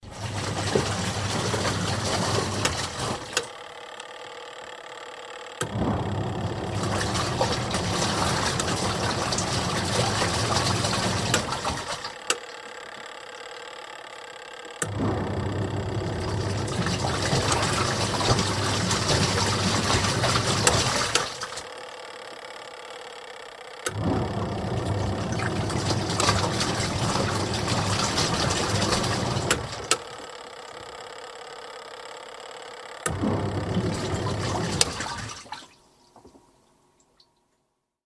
Washing Machine 10 Wash Cycle
drip, tap, faucet, water, Washing, domestic, spin, drying, kitchen, sink, bathroom, Room, bath, dripping, Home, mechanical, running, Machine, drain, wash, spinning